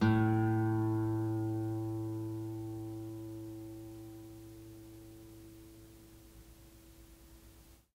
Tape Ac Guitar 2
Lo-fi tape samples at your disposal.
ac, mojomills, lofi, guitar, vintage, collab-2, Jordan-Mills, tape, lo-fi